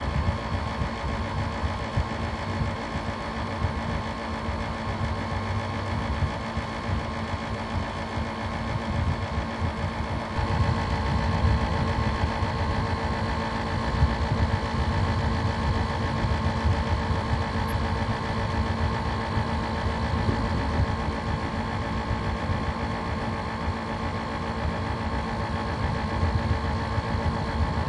ar condicionado fuleiro / busted air-cooler
busted-air-cooler, funny, sound-effect